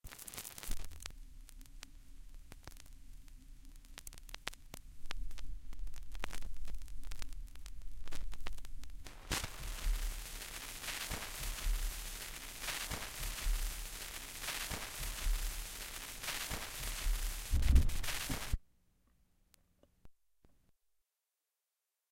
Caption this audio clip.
Vinyl Dust 12
crackle, dust, hiss, noise, static, turntable, vinyl